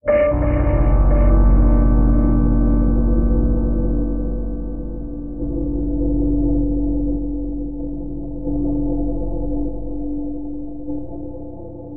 ab area atmos
atmospheric drones area 51 with suspense
ambient, atmospheres, drone, evolving, experimental, horror, pad, sound, soundscape